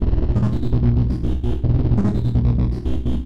grossbass filtered
This is a loop I created using a free Bass synth from KVR called " Bassimo" a glith effect and some filtering.
There's another one to go with it that has some chorus for slight variation.
Which I'm about to upload :0)
bass, synth, loop